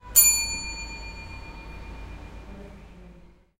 13 Campana timbre Hotel IPIALES
grabacion-de-campo, paisaje-sonoro, pasto-sounds, sonidos-de-pasto, soundscape